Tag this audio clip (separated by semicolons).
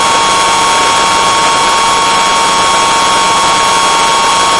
buzzing; distorted; electric; electronic; loud; noise; reverb; synth